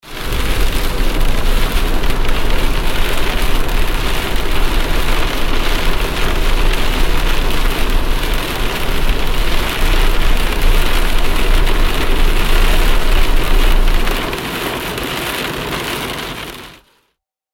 fire storm
funny, this one i recorded with my phone when driving in my car in rainy day.
i thought it sound like a big fire
record multiple takes and combine them
burning, crackling, crackle, fire, storm, flames